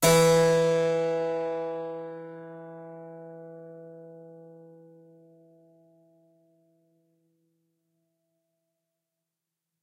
Harpsichord recorded with overhead mics
instrument, Harpsichord, stereo